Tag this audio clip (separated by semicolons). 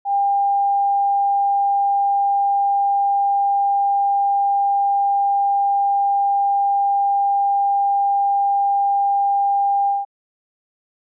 audio; signal; sound; test